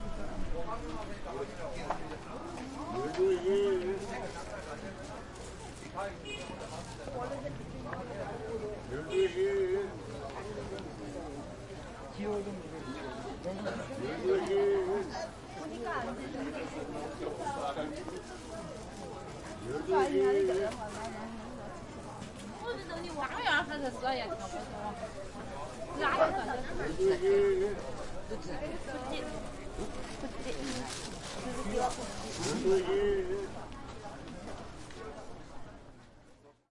Namdaemun street market, Seoul, Korea
field-recording, korea, market, people, street